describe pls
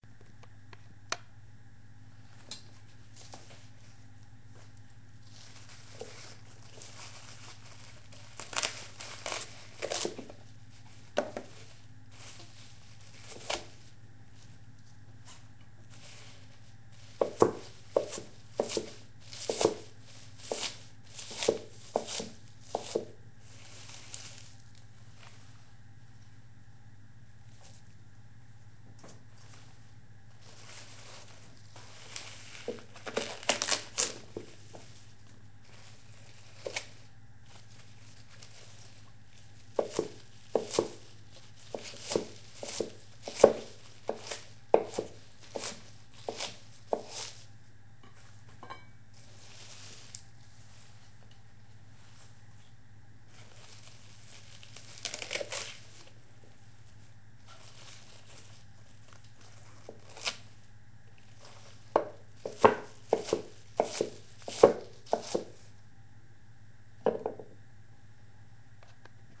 1019-chopping kale
Destemming and chopping kale on a wood cutting board.
cooking, chopping, vegtables, cutting-board